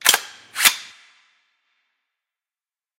M4 Charging Handle 1
A Bushmasters charging handle being cycled!
Bushmaster, Gun, Gun-FX, M4, Magazine